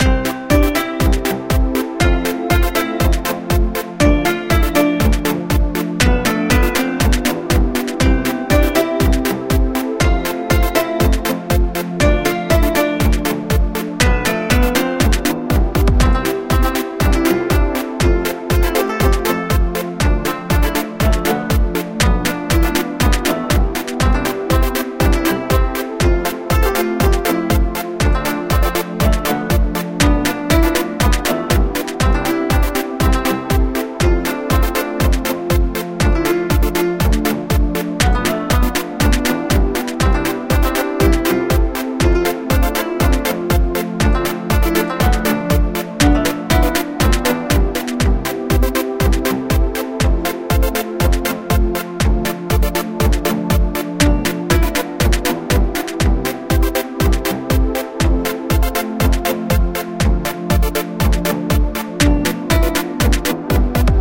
Nhiệm Vụ Hoàn Thành
Loop for finish 'Đôn Hổ' game. Create use Garageband, Remix Tools and World Music Jam Pak 2020.11.18 10:43
120-bpm, finish, game, game-loop, game-music, music